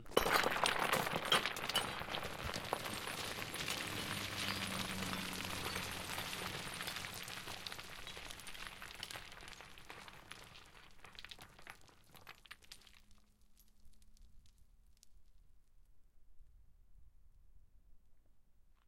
SFX Stone Calcit DeadSea Avalance top #4-174
some small and large stones falling down a hill, very glassy sound